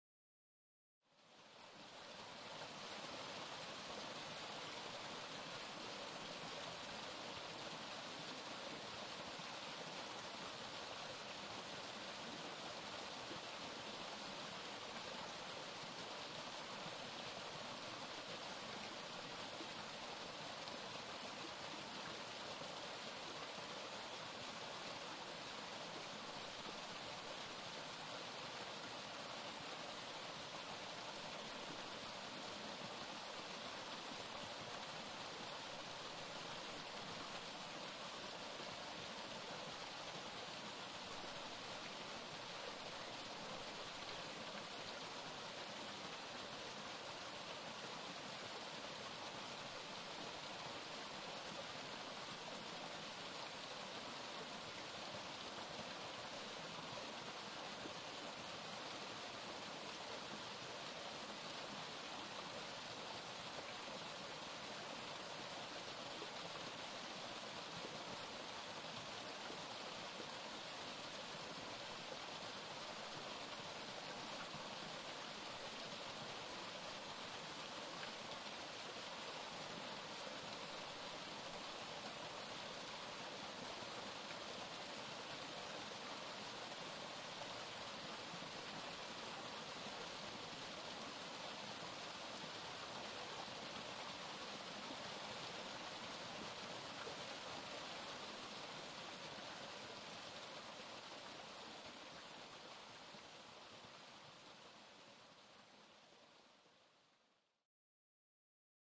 Recorded March 2020 in Olzai (Sardinia).
The creek, surrounded by greenery, flowing over some small rocks.
ambience, creek, nature, river, water